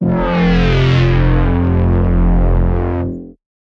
Reso Log Bass F0 - Made with Analog using a slowly and very slight pitch drop on one of the 2 oscillators giving it a tearing sort of sound through the spectrum. A healthy dose of filtering, saturation, compressing and eqing at the end.
**There is also a Bass/Lead counterpart for this sound found in this pack. In most cases the only difference is that the fundamental frequency is one octave up or down.**